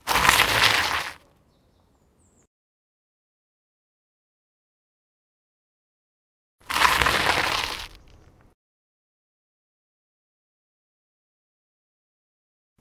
bike brake2
Bicycle braking on gravel.
brake, gravel, bike, tire, bicycle